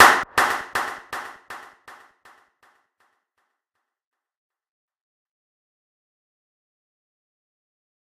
Clap 3 - Delay
This is a record from our radio-station inside the rooms and we´ve recorded with a zoomH2.
Delay, Record, Clap, ZoomH2